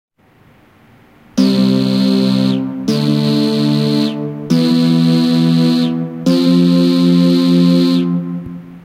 Preset do Volca Keys 4
Preset do Volca Keys. Gravado com app audio recorder para smartphone Android.